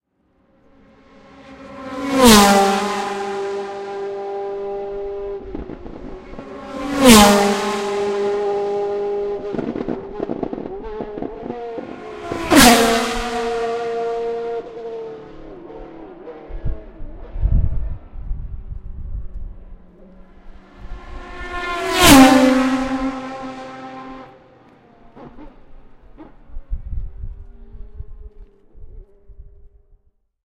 FiaGT.08.PotreroFunes.RedHotBrakes.S10B
FiaGT Practice at “Potrero de los Funes” SanLuis. The Brakes get about 700degrees when they slow-down from 260km to 80. Oh… My Stomach…it still hurts !!
fia-gt, race, zoomh4, car, engine, accelerating, noise, field-recording, racing, sound